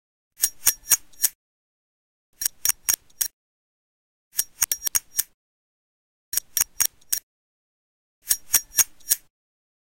Zippo scraps
all the scraps Ii had over from the 1st file zippo open light close chucked in here to this one and gave a little reverb and mix pasted all in together
zippo, I-made-this, metallic, synthetic, chirp, recording, steel